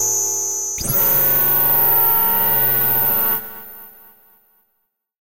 MemoryMoon cyborga
This is part of a soundesign work for the new memorymoon vsti that emulate the legendary Memory Moog synthesizer! Released after 15 moths of development by Gunnar Ekornås, already known for the amazing work on the Arppe2600va and Minimogue as member of Voltkitchen crew.
The pack consist in a small selection of patches from a new bank of presets called "moon mobile bank", that will be available as factory presets in the next update ..so take it just like a little tease.
The sound is digital robotic feedback. Onboard effects, no additional processing.
sci-fi, electric, synthesizer, analog, cyborg, sound-effect, feedback, electronic, fx, hi-tech, synth, soundesign, soundeffect, space